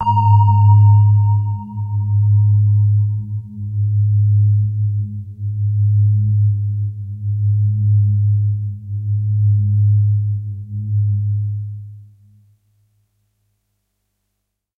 This is a sample from my Q Rack hardware synth. It is part of the "Q multi 011: PadBell" sample pack. The sound is on the key in the name of the file. A soft pad with an initial bell sound to start with.
bell, bellpad, electronic, multi-sample, pad, synth, waldorf